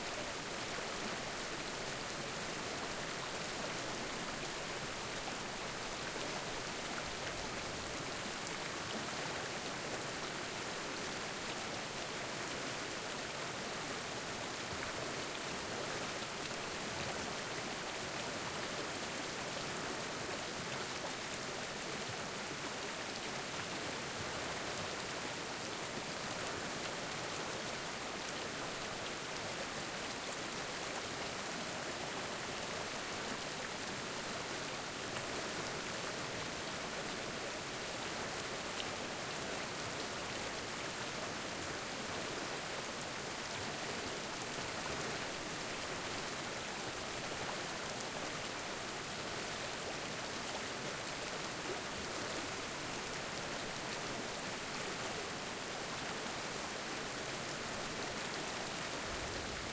A composite sound, made to emulate very full, fast moving white water. Recorded in various locations in Boulder County, Colorado. Loopable.